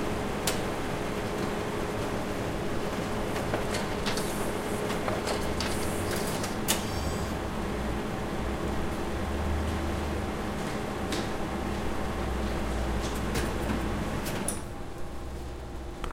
Photocopier background at Poblenou Campus UPF library